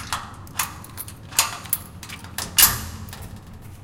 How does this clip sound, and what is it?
Door - Metal Grate, Lock/Unlock
Lock/Unlocking a metal grate with key.
Recorded on a Zoom H4n recorder.
door, field-recording, grate, key, lock, metal, unlock